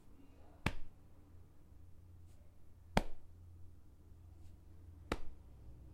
Recorded on an Iphone 6, a tennis ball being thrown and caught.